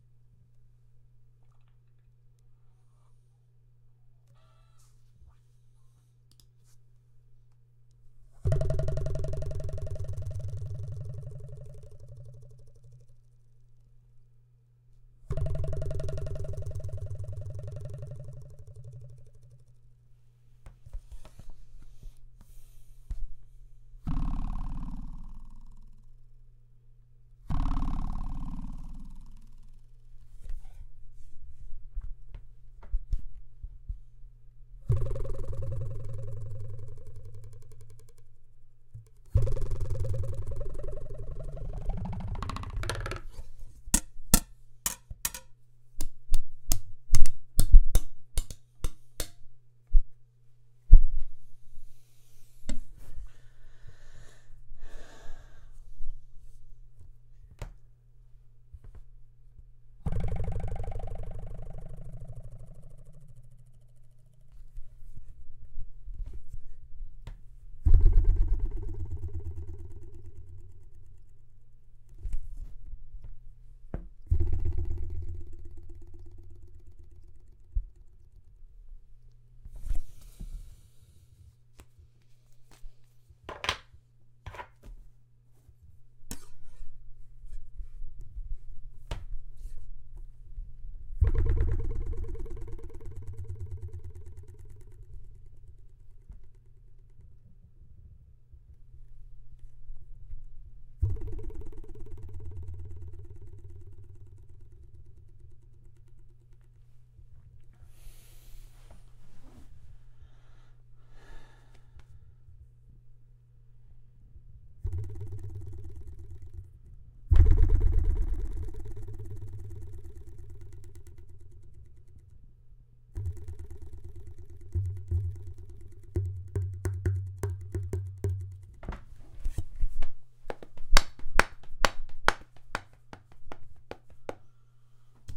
Ruler Boing
A dry cartoon boing. Created using a large heavy ruler and a desk, several articulations.
Recorded in mono using a single Rode Oktava through a Focurite interface direct into PT10.